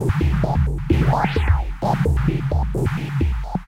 Drumloops with heavy effects on it, somewhat IDMish. 130 BPM, but also sounds good played in other speeds. Slicing in ReCycle or some other slicer can also give interesting results.
drumloops, processed